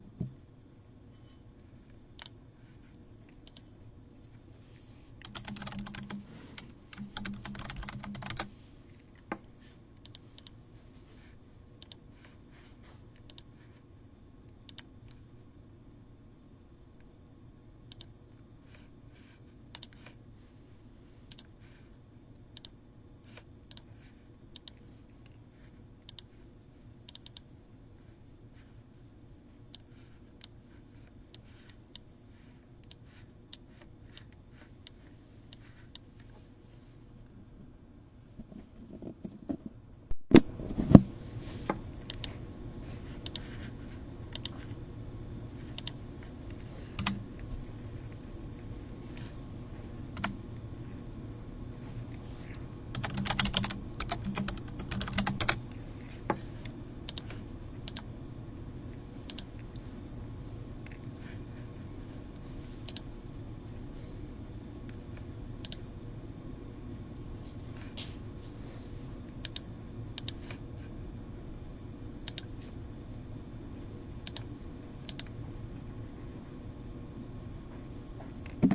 Recording of typing on keyboard and mouse clicking. Recorded on Zoom H2.